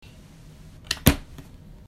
Door close
Can be used as a door closing sound effect.
close, door, doors, open, shut, slam